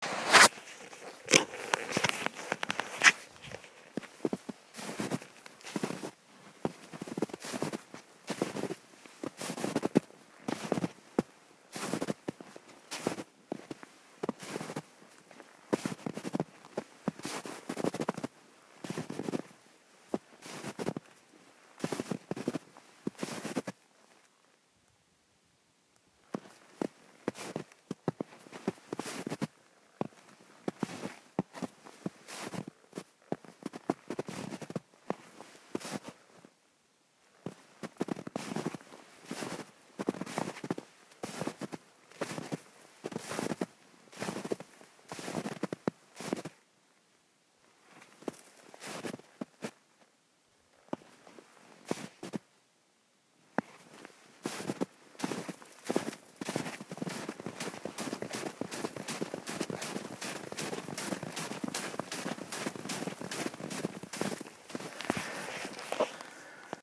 Sneaking around in snow 10 cm-2
Recorded on a trail in the woods in 10 cm deep snow
around, feet, foot, footsteps, freeze, silent, Sneaking, step, steps, stops, walk, walking